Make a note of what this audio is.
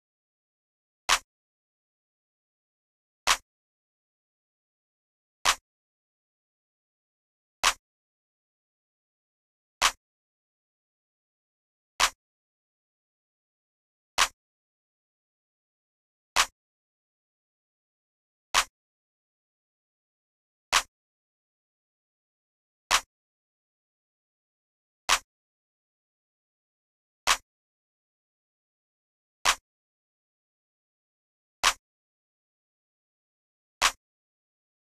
Clap 2, 110 BPM
Clap, Trap, Hard